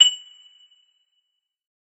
This is part of a multisampled pack.
The chimes were synthesised then sampled over 2 octaves at semitone intervals.
chime, metallic, one-shot, short, synthesised